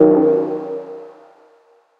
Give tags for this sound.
audio
shot
electronic
production
stabs
sample
One
samples
Dub
music
electronica
stab
live
synth

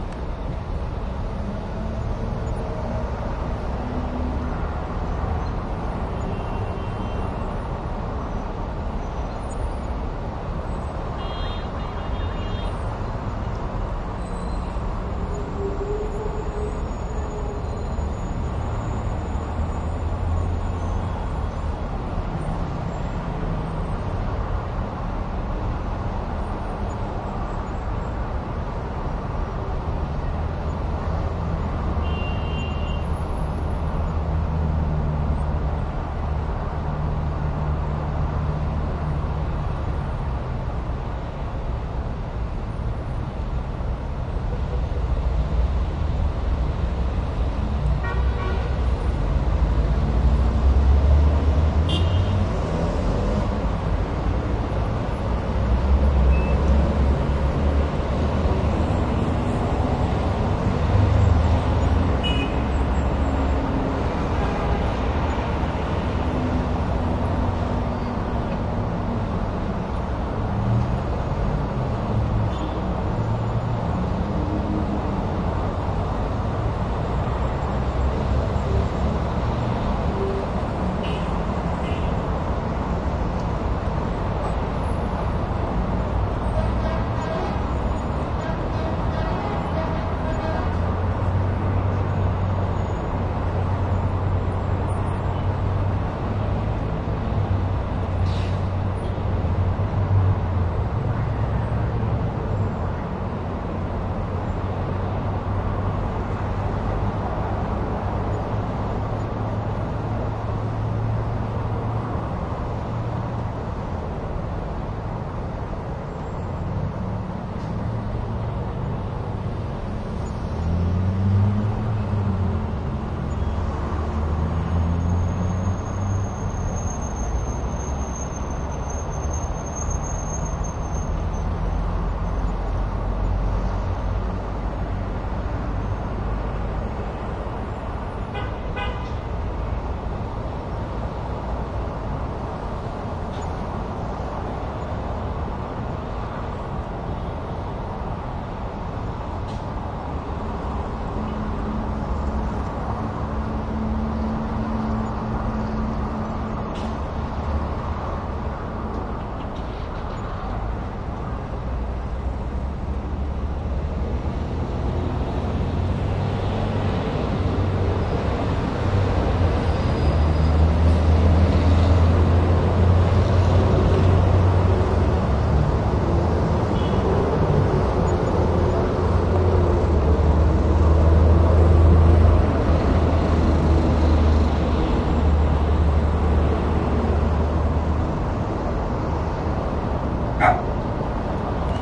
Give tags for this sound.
ambience
cars
traffic
noise
Russian
field-recording
street
city

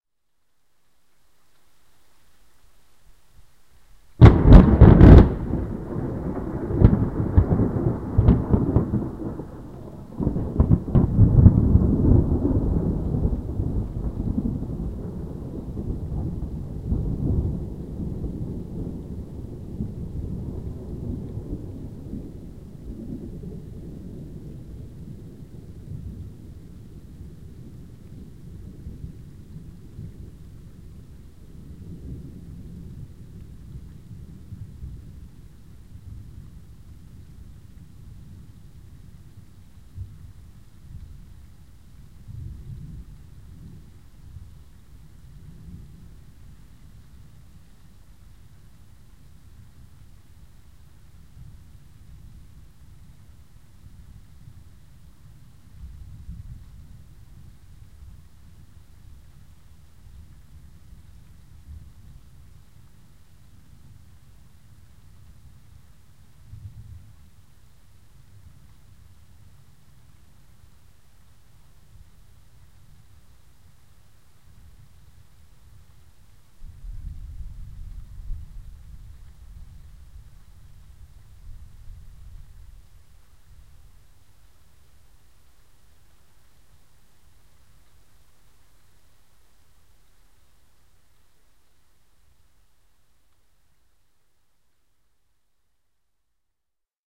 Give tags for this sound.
strike
thunderstorm
lightning
thunder
field-recording
weather
storm

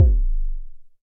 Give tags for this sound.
Kick
Modular
Recording

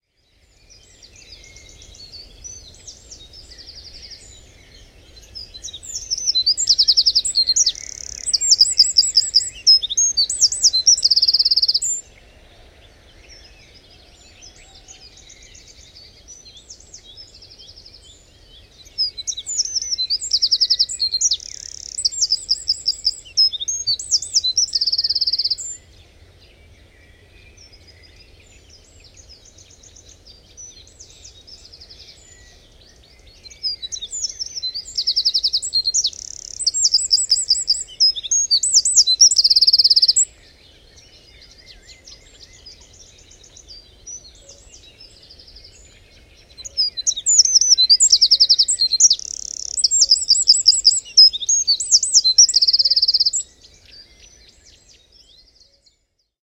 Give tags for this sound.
spring
birdsong
wren
Troglodytes-troglodytes

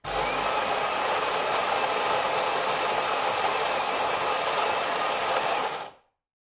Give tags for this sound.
buzz
clank
coffee-machine
electro-mechanics
grind
saeco

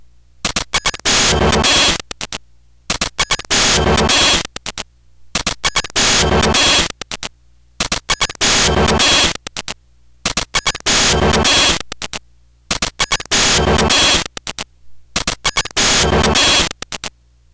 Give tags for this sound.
corruption
electronic
gameboy
glitch

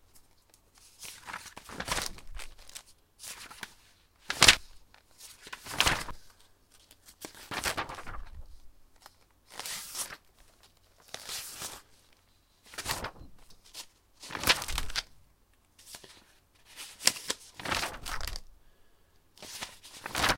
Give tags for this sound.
book
paper

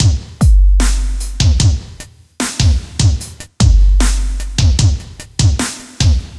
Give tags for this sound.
club
hip
samples
break
bpm
hop
75-bpm
funky
sample
breakbeat
75
trance
groove
high
quality
beat
75bpm
dance

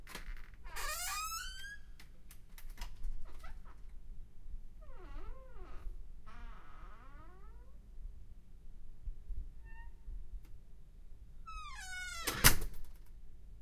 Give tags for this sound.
closing
door
foley
Office-door
opening
soundfx

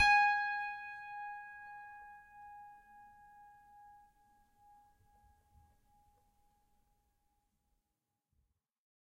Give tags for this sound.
strings
piano
fingered
multi

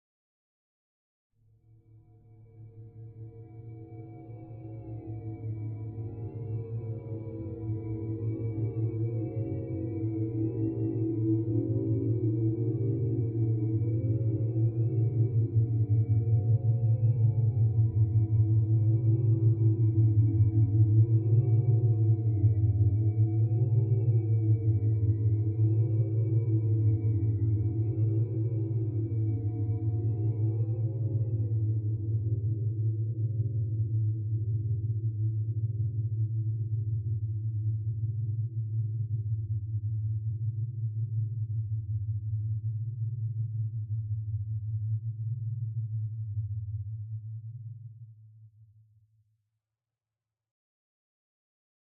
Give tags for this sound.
atmos
atmosphere
background
haunted
phantom

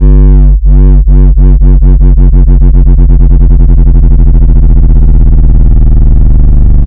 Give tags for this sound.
pitch-shift
power-down
low
sub
loop
140-bpm
audacity
sine
sub-bass
down
140bpm
wobble
power
dub
dubstep
tech
bass
technology

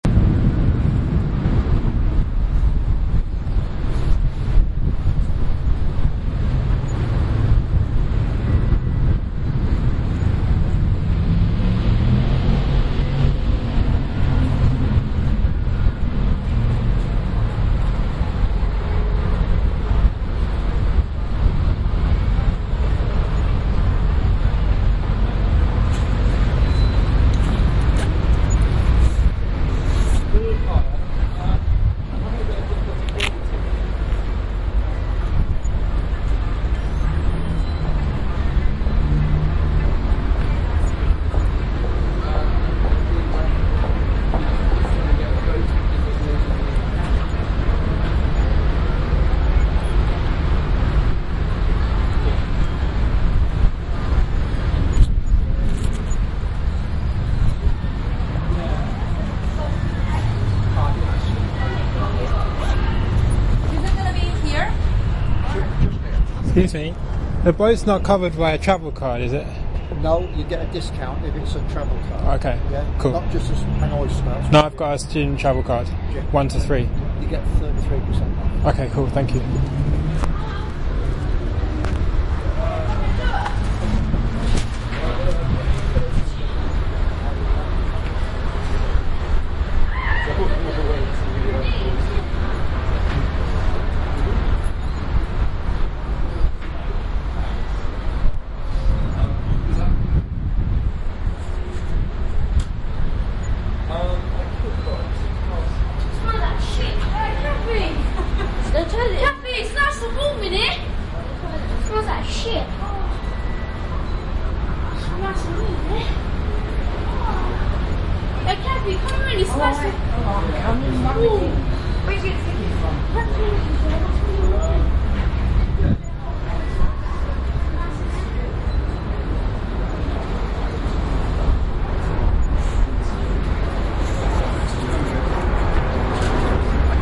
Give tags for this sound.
atmosphere
ambiance
ambient
london
general-noise
ambience
field-recording
background-sound
soundscape
city

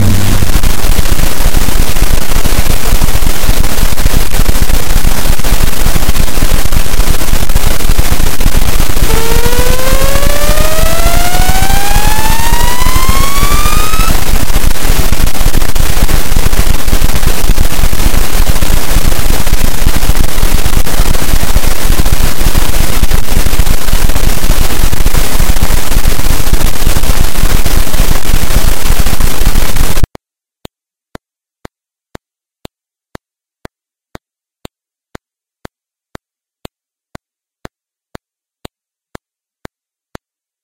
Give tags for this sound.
crunchy
crackle
fuzz
snap
buzz
nasty
distortion
zap

